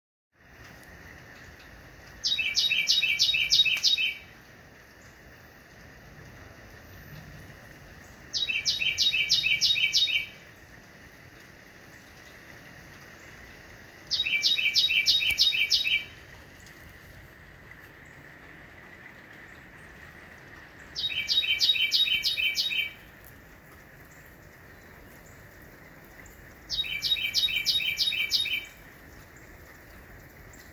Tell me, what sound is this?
A Carolina Wren calling from a palm tree on a damp, spring, Florida day.